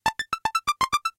Data terminal input
A sound effect sounding like an old data terminal (the green/monochrome type), ideal for use in films.
input, keypad, button, computer, square-wave, terminal, beep